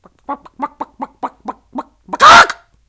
Angry chicken imitation
A man doing a vocal imitation of a chicken. Clucking followed by a loud, angry "ba-gok!" sound.